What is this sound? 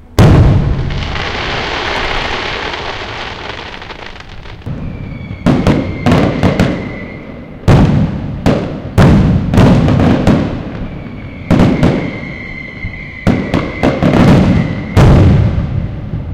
fireworks, recorded at some 500 m from source /fuegos artificiales grabados a unos 500 m
city, field-recording, fireworks, sevilla, south-spain